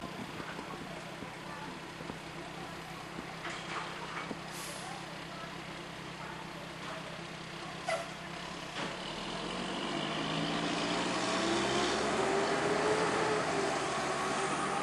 A distant dump truck stands ital for a few seconds while picking up trash, then leaves
A dump truck stands at ital, motor on, picks up several bottles then pulls away, faint conversation in the background, recorded with a WS-321M.
motor, vehicle